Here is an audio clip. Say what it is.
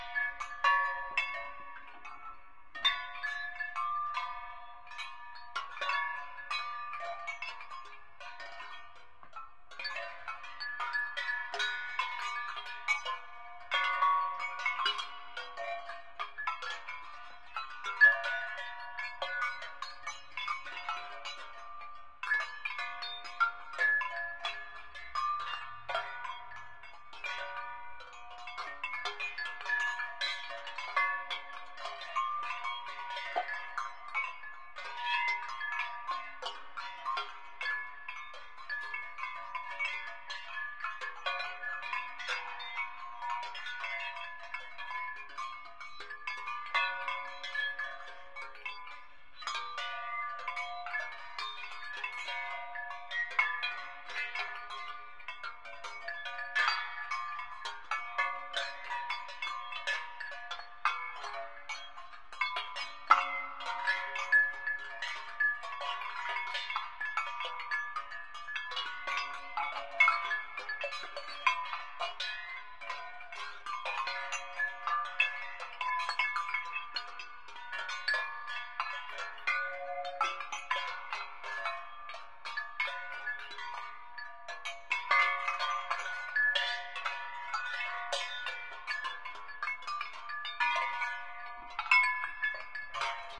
Sheep Bells
A loopable sound I made to emulate the bells of a flock of sheep. Great to play your fujara to!
bells, chimes, pasture, sfx, sheep, shepherd, wind, windchimes